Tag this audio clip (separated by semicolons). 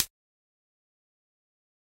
short; hihat